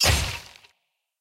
Hit Impact Sword 2
medieval, torture, game, gore, heavy-hit, knight, knife, metal, heavy-sword, hit, blade, sword-impact, sword, sword-hit, game-fx, video-game, swing, blood-hit, slash, terror, big-sword, cut-flesh, sword-swing, impact